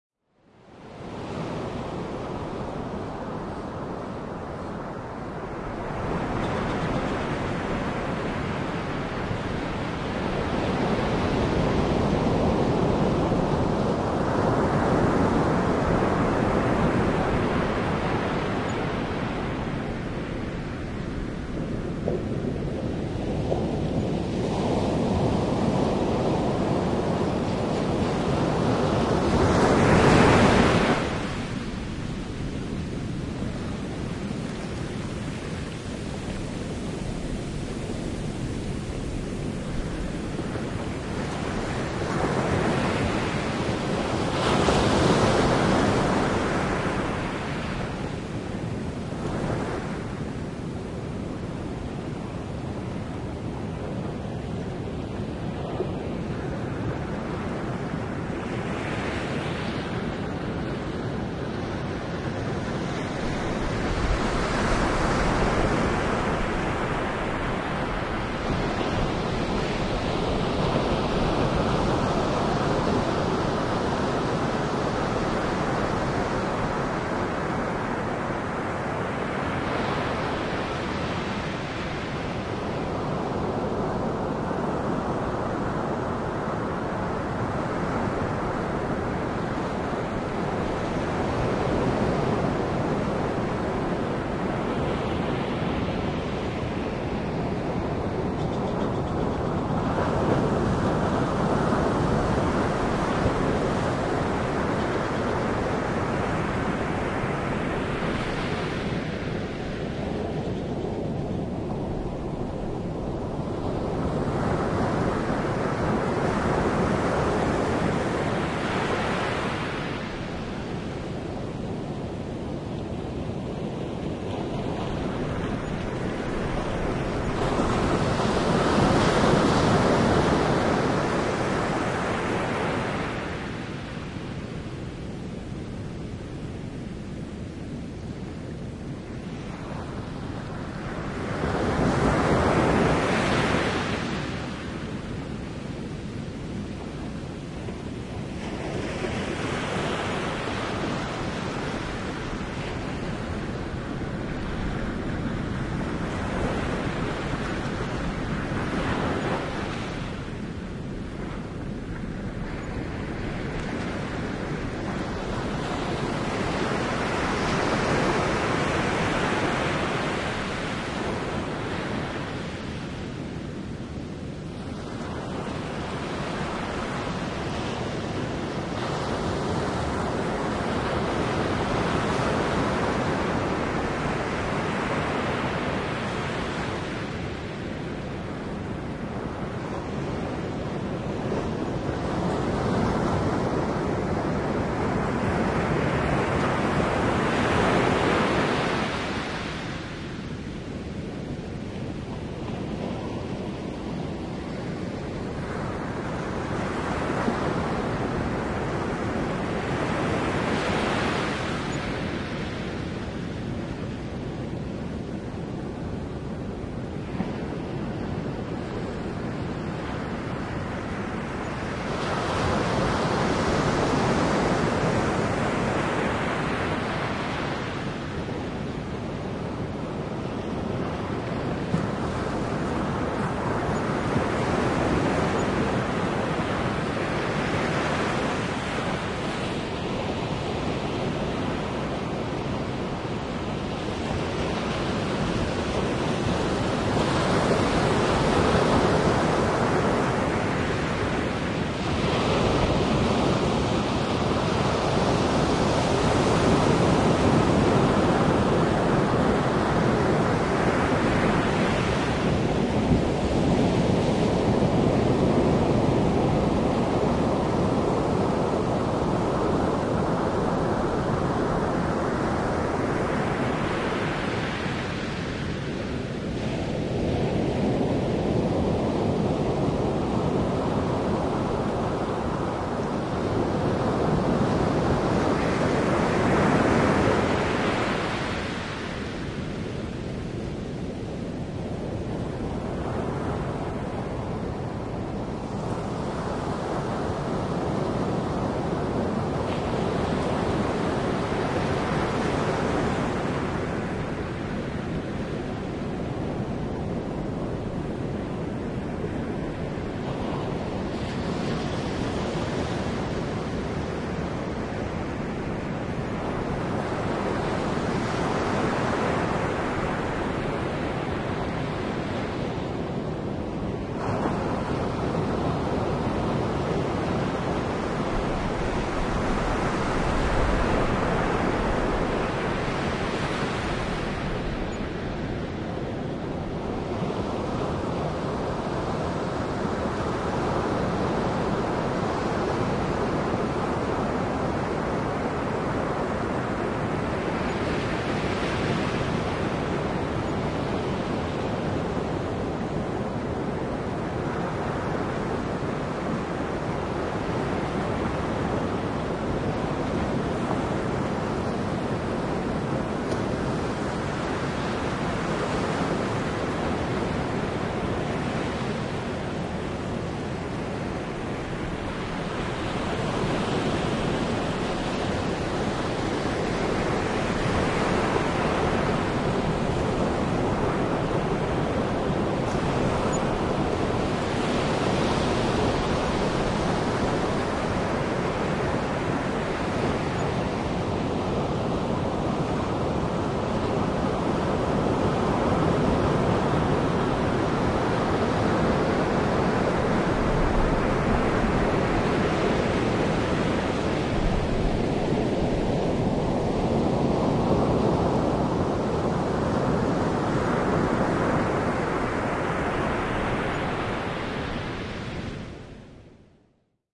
waves jan3 2011
My first recording of 2011. Waves coming to shore on the coast of Oregon. To the left is a large open beach, to the right a rock cliff, to the front, the Pacific. At about 30 seconds in, there is a large wave that comes very close to my feet and as the wave receded all the popping bubbles sound like static. Recorded with AT4021 microphones into a modified Marantz PMD661.